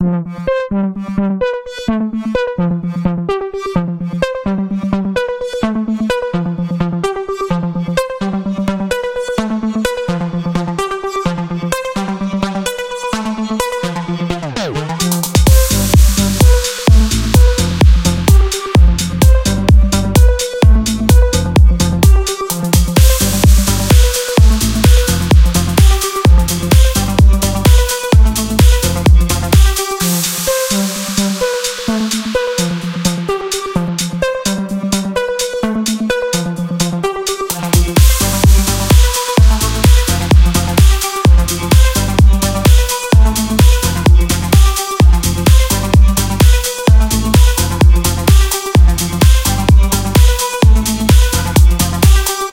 Future Rave 128bpm
This sound was created with layering and frequency processing.
BPM 128
Background, Dance, Electronic, Future, Melody, Synthesizer